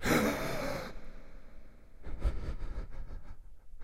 Sharp intake of breath, as some one suddenly startled by something, with reverb.